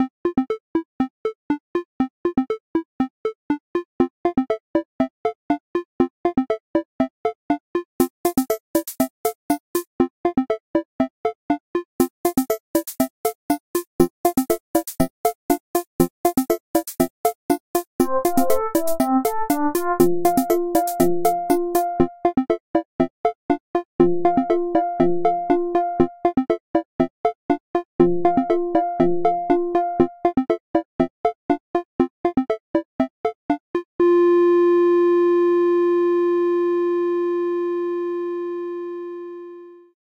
Little, happy tune - 22.10.2015
A little track I did using nanoloop for Android.
It's always nice to hear what projects you use it for.
For more stuff you can also check out my pond5-profile:
Or on the Unity Asset Store (including free assets):
easy, gaming-console, 80s, 90s, positive, retro, nanoloop, comedy, 8-bit, handheld, achievement, chiptune, console, adventure, video-game, eightbit, digital, funny, cartoon, medium-tempo, happy, chip, gaming, game, uplifting, vintage, proud, fun, cheerful, music